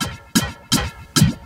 Video Game Jump Sound Effects
videotape-sounds, jump, effects, videogame